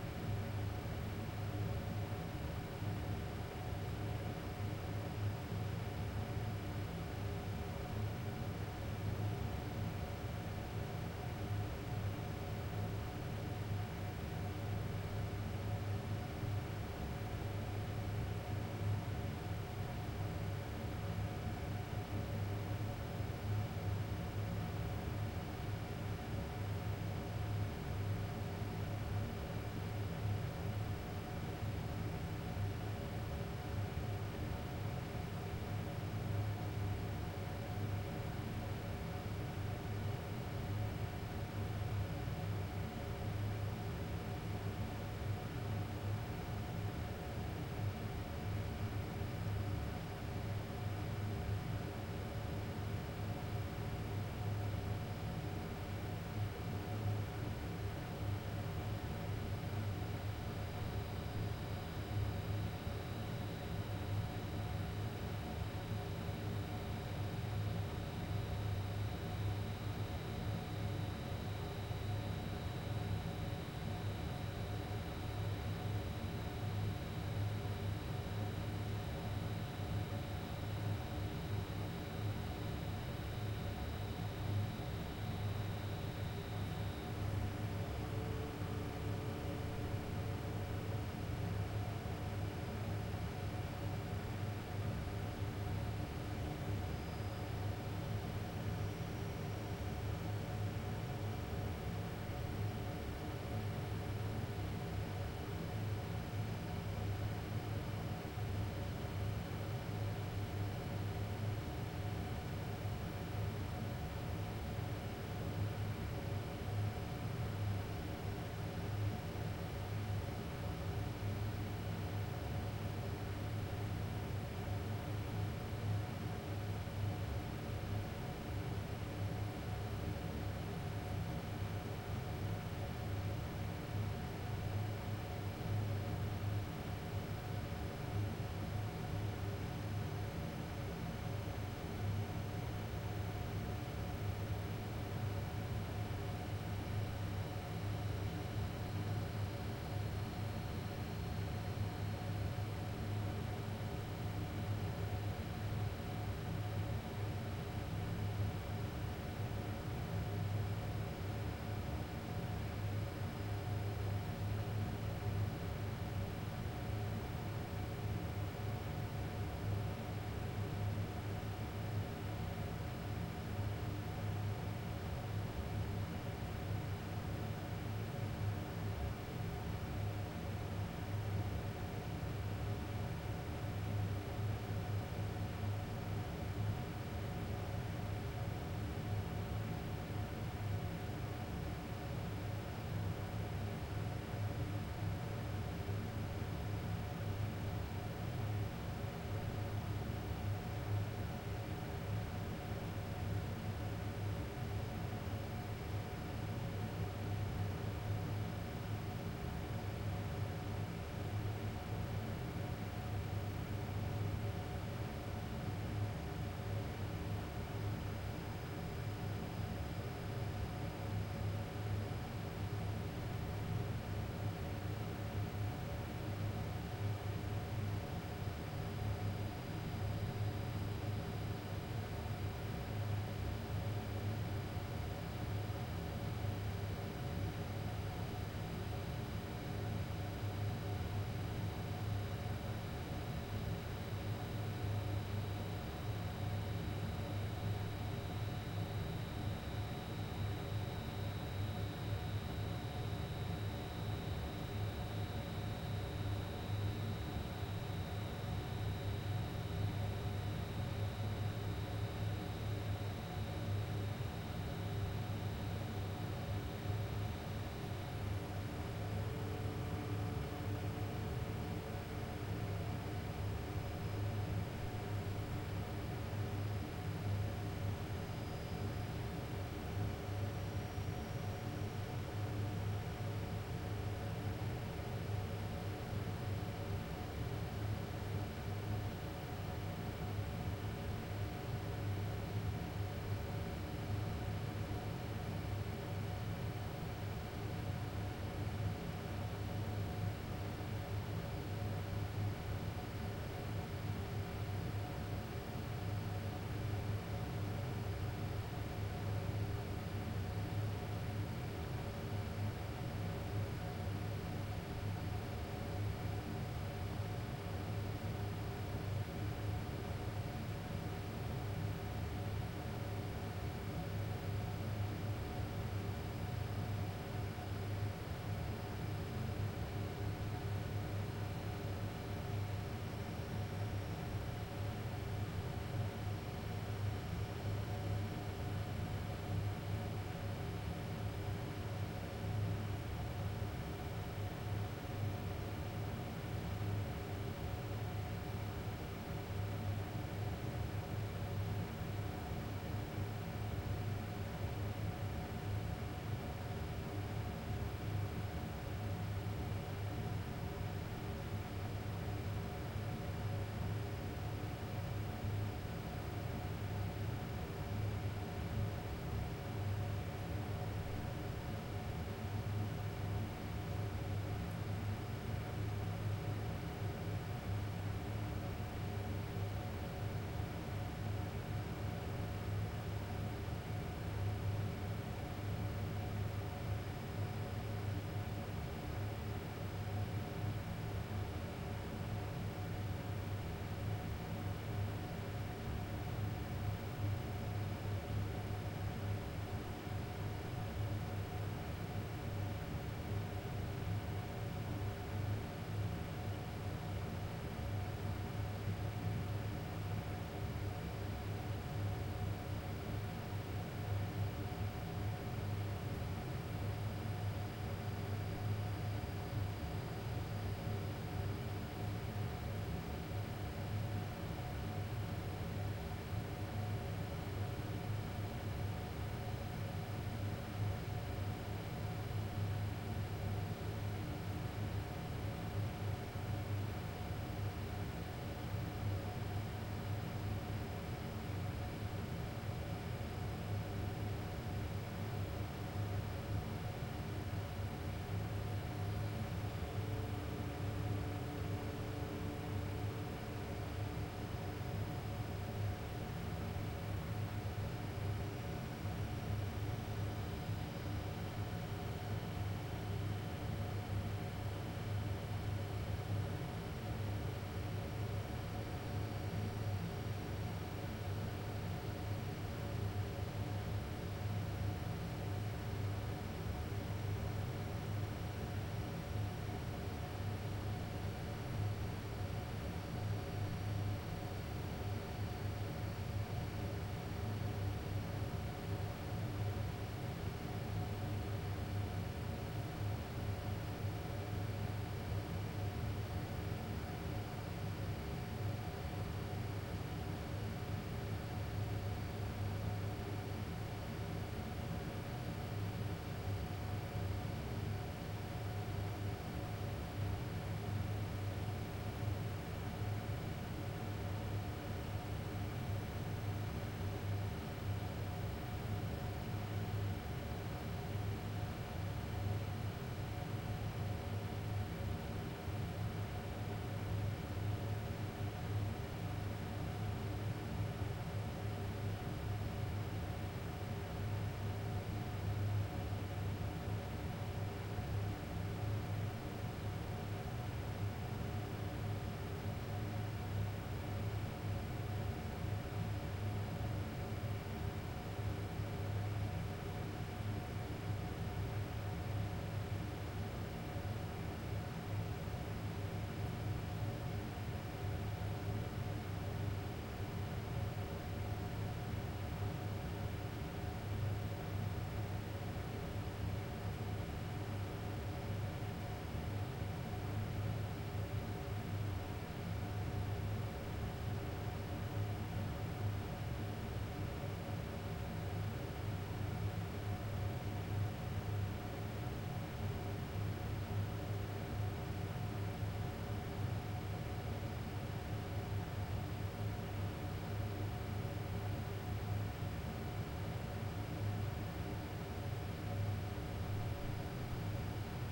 Engineering Lab. No people. Large air-conditioned room full of servers, fan sounds, fans changing speed, hum and whirring noises.
Part of a pack recorded in different labs/server rooms.
Zoom H1, internal mics capsules, no filters.
computer, electronic, engineering, equipment, fans, lab, laboratory, noise, room, room-tone, roomtone, server, servers, tone, whirring, workshop